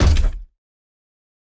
MECH STEPS - 2
Footstep for mechanical droid or any type of medium sized robot.
droid, footsteps, mech, robot, scifi